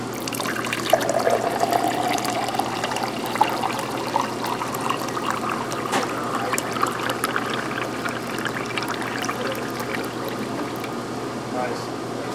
pouring coffee
This is a recording of empty glasses clinking together on th ecounter of the Folsom St. Coffee Co. in Boulder, Colorado.
coffee, pour, shop